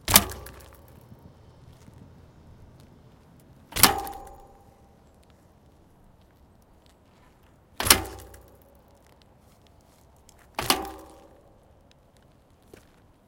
bike thumps front
bicycle hitting ground after a jump